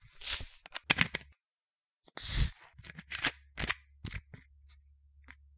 cola-bottle
a plastic cola bottle opened and closed.